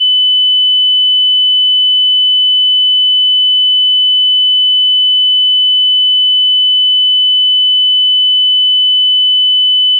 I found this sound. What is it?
Set computer volume level at normal. Using headphones or your speakers, play each tone, gradually decreasing the volume until you cannot detect it. Note the volume setting (I know, this isn't easy if you don't have a graduated control, but you can make some arbitrary levels using whatever indicator you have on your OS).
Repeat with next tone. Try the test with headphones if you were using your speakers, or vice versa.